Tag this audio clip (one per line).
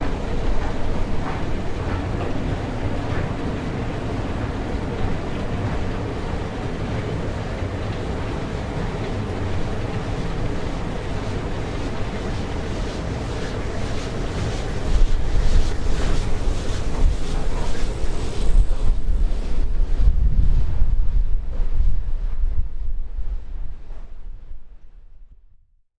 outside
jeans
windy
pants
walk
walking
wind
clothing